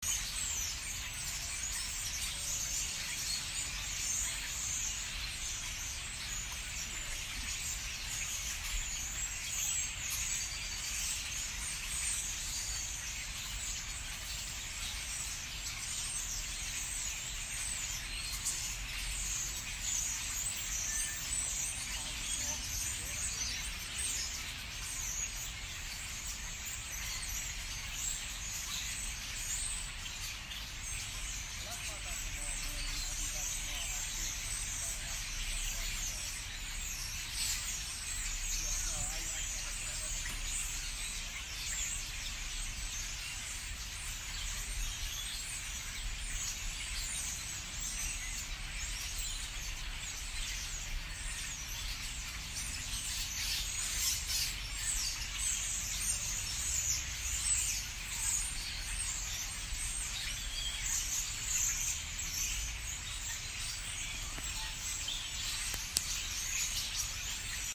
Birds chirping in the morning - Portland, OR
Birds recorded on my iPhone during a winter morning walk in Portland, OR.
Portland, birds, bird-sounds, morning-walk, field-recording, Oregon, bird-chirping